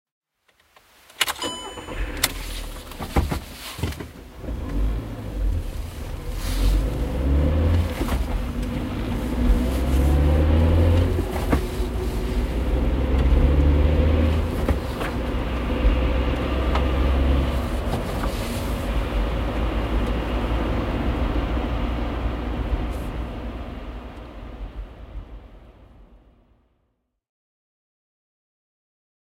Car start and driving away 2
Starting up a VW Polo and driving away. H2n inside car.
car, car-start, driving-away, ignition, inside-car, starting